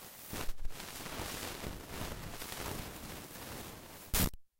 A czech guy named "staney the robot man" who lives in Prague build this little synth. It's completely handmade and consists of a bunch of analog circuitry that when powered creates strange oscillations in current. It's also built into a Seseame Street toy saxiphone. Some hissy noise sounds.
synth, circuit-bent, analog, hiss, long, noise